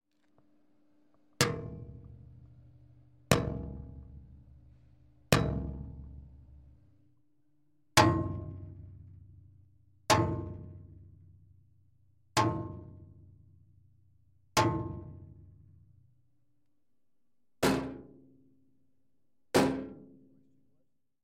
Tapping and hammering various metallic objects